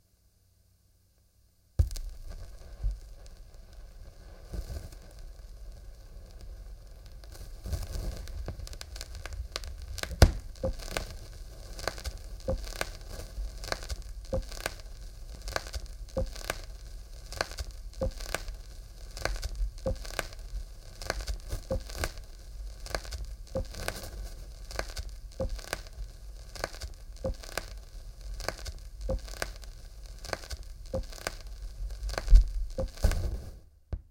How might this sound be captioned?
RECORD END LOOP
A stereo recording of the end of a record looping with the needle down. Recorded through a floor speaker using
Stereo matched Oktava MC-012 cardioid capsules in an XY Array.
field-recording, sound-effect